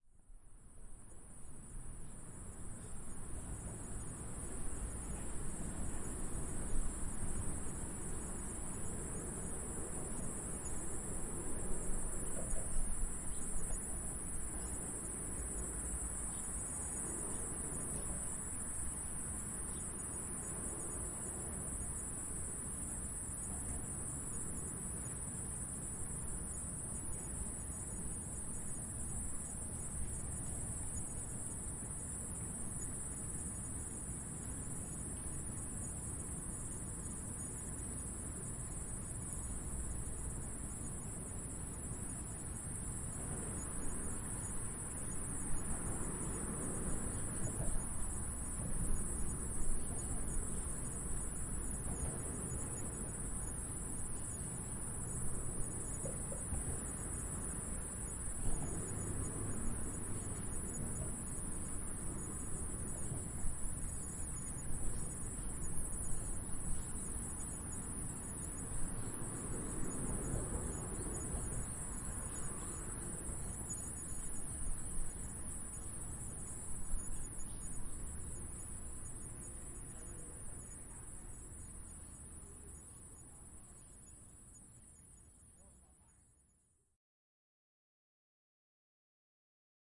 Bats Houston
Recording of huge bat colony underneath and overpass in Houston, TX.